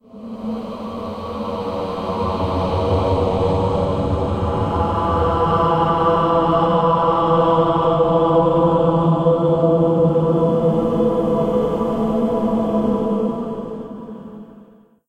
synthchorus haunted
Synthetic Chorus Sound Stereo